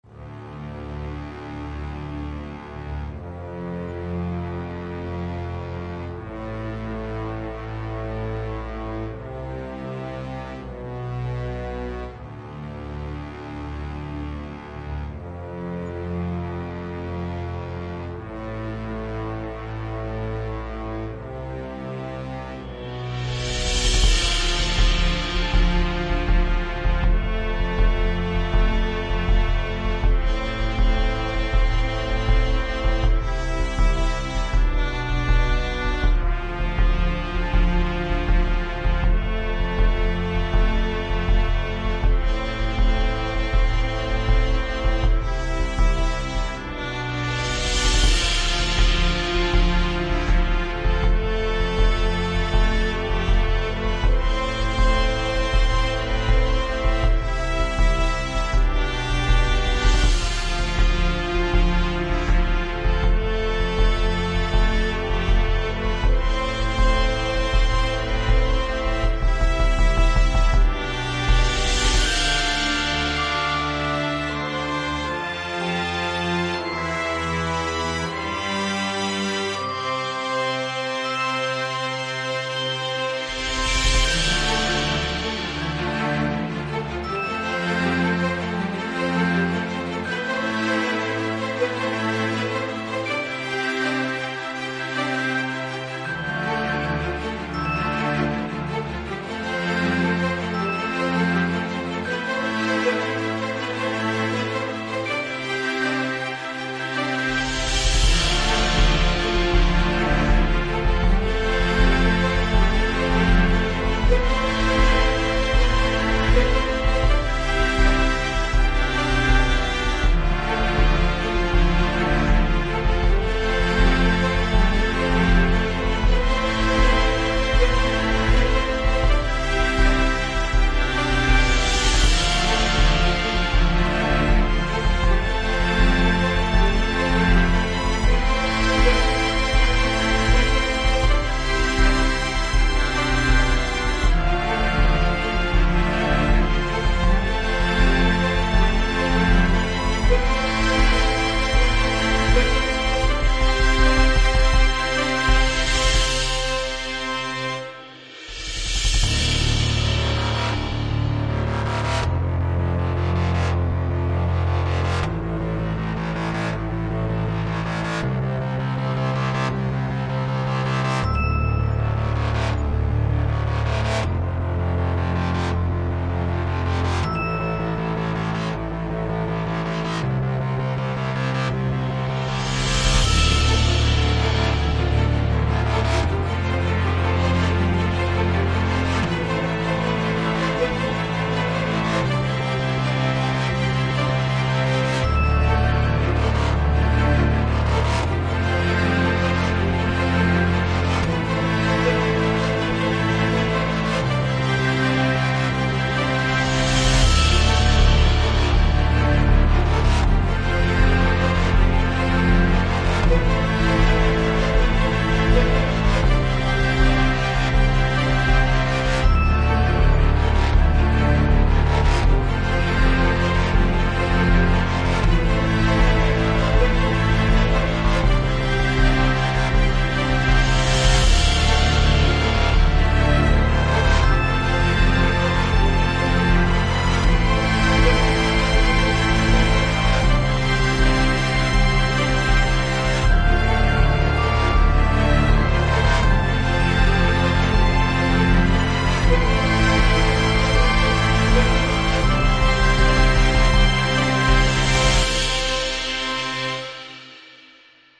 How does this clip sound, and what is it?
Cinematic Music - Judgements
A very old cinematic track i made, never got sold and used in a production.
Maybe it will have a purpose on here.
Enjoy.
Fantasy, Judgements, Suspense, Orchestra, Film, Cinematic, Outro, Intro, Music, Action, Trailer, Game, Movie